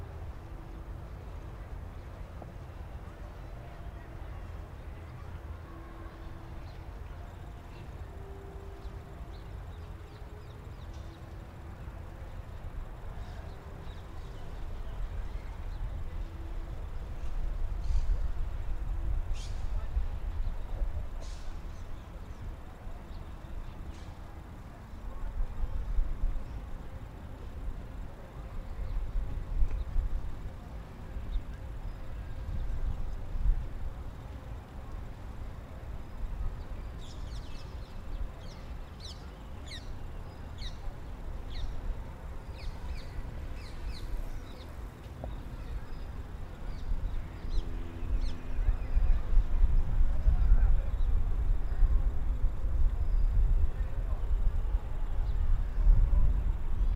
city park Tel Aviv Israel
city park in Tel Aviv Israel